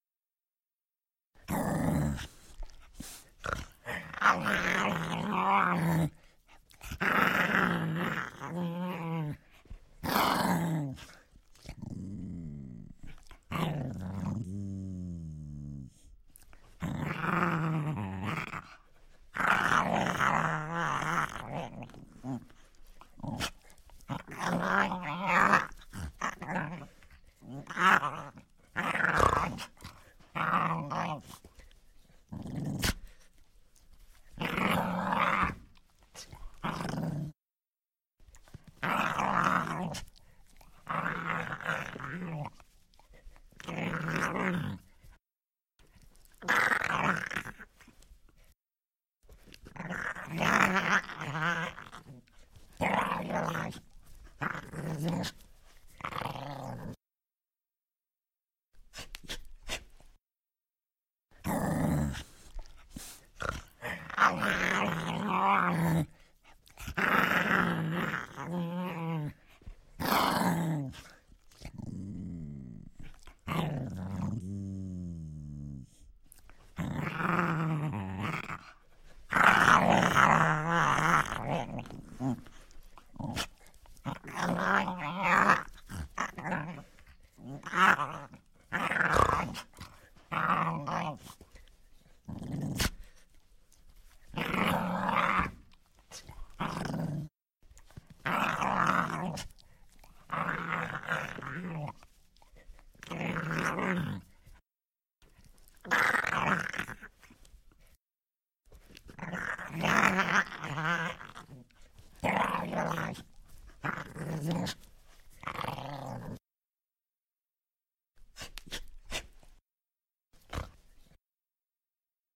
Solo Zombie 8
Single groaning zombie. Syncs at 08.24.14
undead, dead-season, voice, groan, monster, zombie, solo, horror